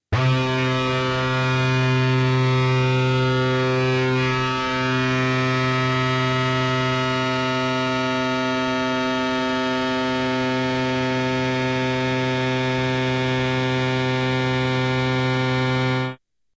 horn stab 1 long
the remixed samples / sounds used to create "wear your badge with pride, young man".
as suggested by Bram
percussion, printer, short, impresora